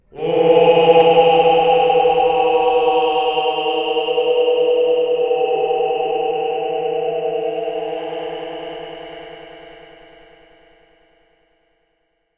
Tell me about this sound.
STUPID OOOOOOOOOHM CHOIR (MORE ECHO AND REVERB)
Same as "STUPID OOOOOOOOOHM CHOIR", but I added echo and reverb to the sound. Recorded with a CA desktop microphone, processed in Audacity.
creepy, echo, idiots, ominous, ooooooooooom, oooooooooooooh, reverb, stupid, stupid-choir, suckers